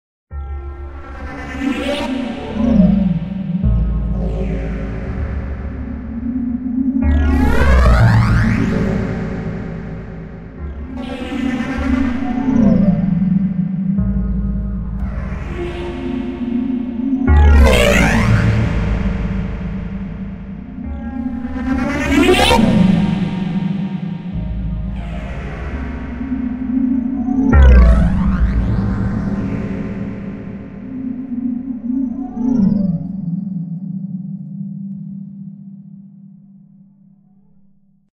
goldfish racing (water)
This little ditty is one of my own electronic beats run through a doppler plugin and added reverb/delay. The effect is really cool.
ambient, soundscape